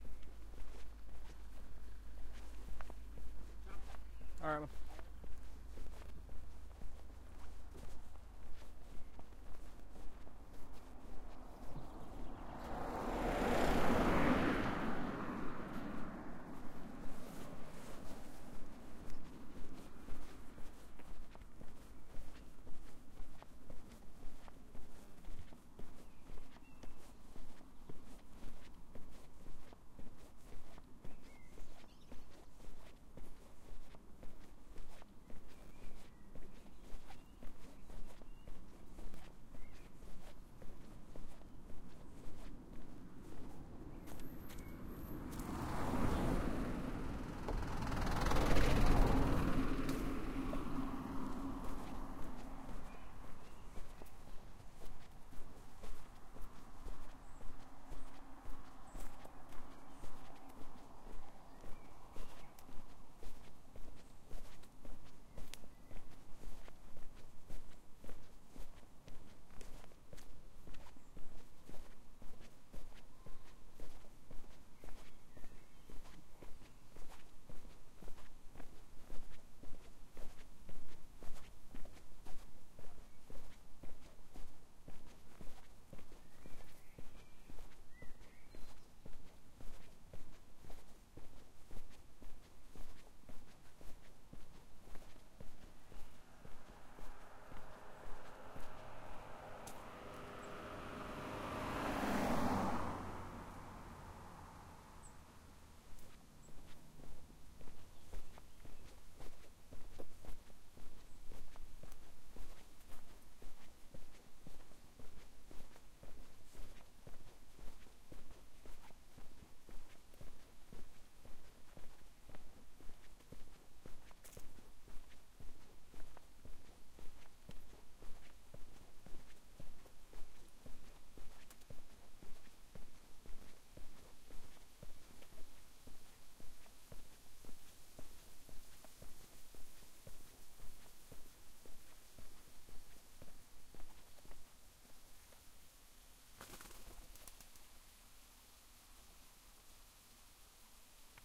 Walking on tarmac road with intermittent cars driving by
A recording of me walking along a tarmac forest road.
A stream can be heard at 2:10. At 2:25 a bird flys away.
Birds can be heard throughout and cars drive by intermittently.
Wearing jeans and rubber-soled puma suedes.
Recorded with a Tascam DR-05 during Irish summer
foot, footstep, walk, summer, cars, driveby